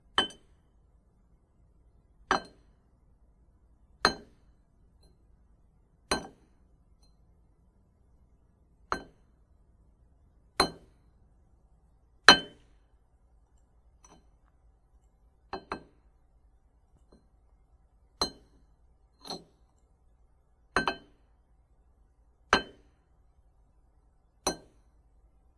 Ceramic Plate Set Down
A ceramic plate placed, or set down, on a table. Multiple takes.